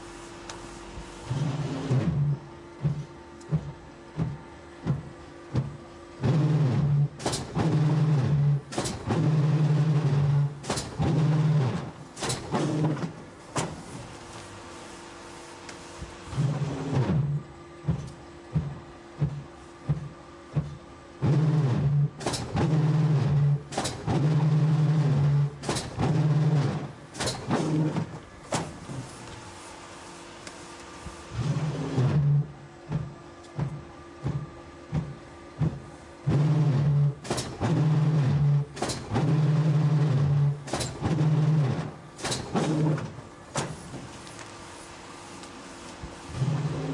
cardboard factory machine-001
some noisy mechanical recordings made in a carboard factory. NTG3 into a SoundDevices 332 to a microtrack2.
machine, mechanical, robot, industrial, machinery, motor, factory, engine, loop